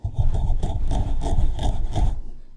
scratching my nails against my pop filter